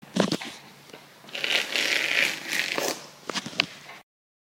MySounds GWAEtoy Carpet
TCR, recording